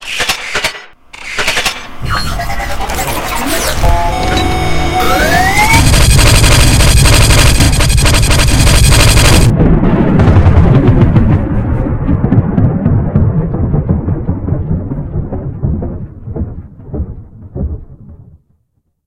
SS's pulse cannon audio
A sound I created for my Decepticon oc "Silverstreaker." Her dual pulse cannons are heard in the audio, however I published it here so others can use it as well! Hope this can be of use for some people.
You can freely use this wherever you want, but please if possible link me in it if you do.
<3
[If the sound seems gritty and grainy, it sounds much better when downloaded.]
Thanks for using my hard works and efforts and using it for whatever you may be working on or working towards!
-Thanks!
future, laser, pulse-cannon, rapid-fire, sci-fi, weapon